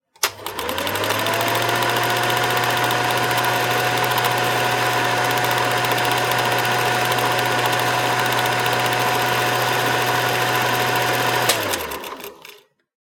Super 8 Projector, startup, operating, shutdown [8pre, Mic-L Cond.,G]
A Super 8 projector recorded with a single large condenser microphone and a MOTU 8-pre with the mic placed one feet from the source. Slight gain added, but otherwise unprocessed.
super8, film, projector, 8mm, machine, unprocessed